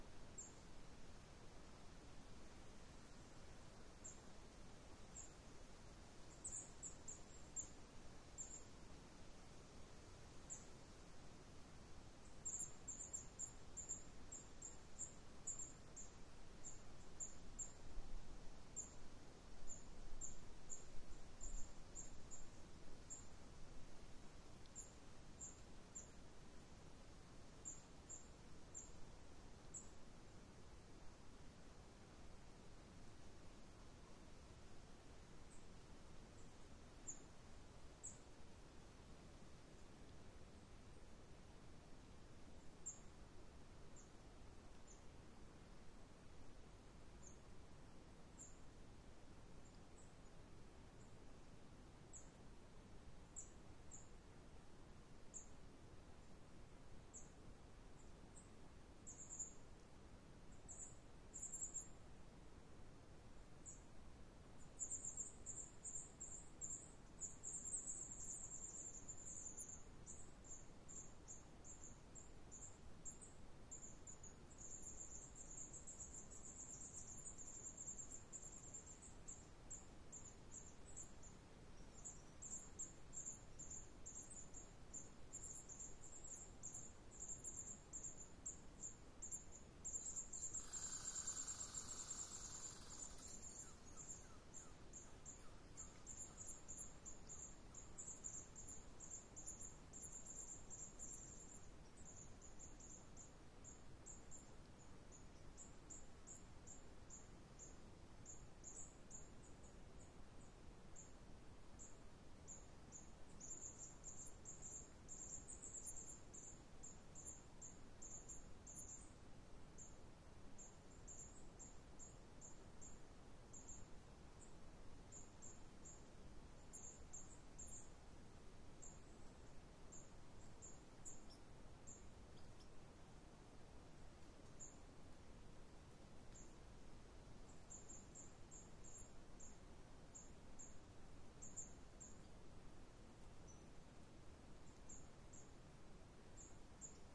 Forest birds, light breeze, chipmonk warning call 2
Forest birds, light breeze, chipmonk warning call. This sample has been edited to reduce or eliminate all other sounds than what the sample name suggests.
birds, forest, field-recording